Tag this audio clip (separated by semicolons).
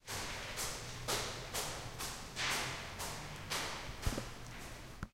campus-upf; going-up; library; stairs; steps; UPF-CS12